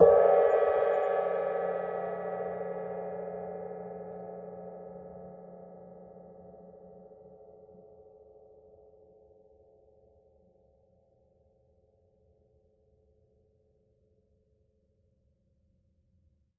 Single hit on an old Zildjian crash cymbal, recorded with a stereo pair of AKG C414 XLII's.